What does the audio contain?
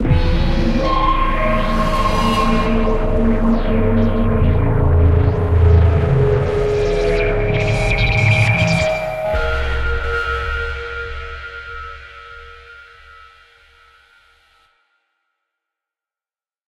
Going crazy with my midi controller.